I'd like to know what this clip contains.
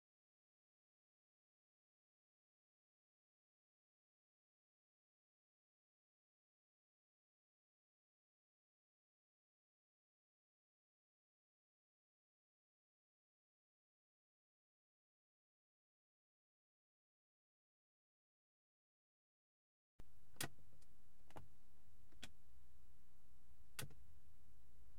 The antenna height switch on a Mercedes Benz 190E, shot from the passenger seat with a Rode NT1a.